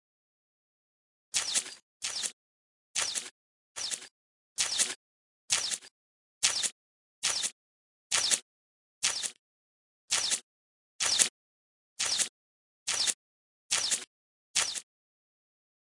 Water sound 1
Sound of water flowing.
Field-recording, Garden, Makbul